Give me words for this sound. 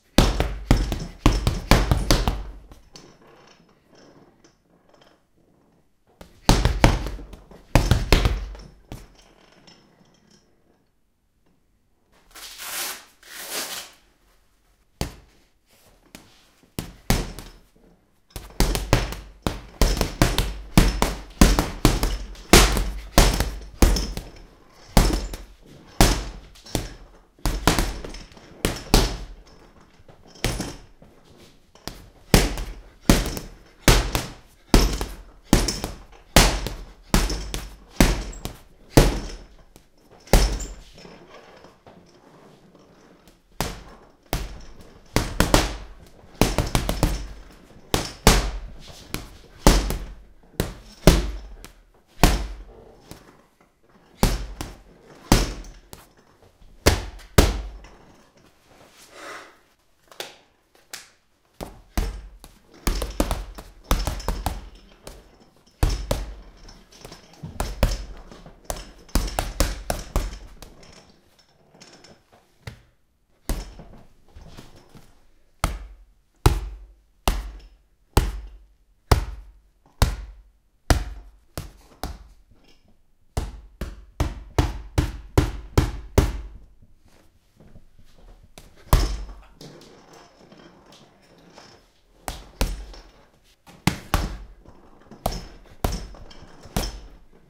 Punching Bag Sound Effects
Punching a punching bag.
Recorded with Zoom H2. Edited with Audacity.
boxing, punch, punching-bag, knuckles, hitting, knuckle, zoom, punch-bag, punching, h2, meet-a-fist, fist, zoom-h2, bag, strike, striking, hit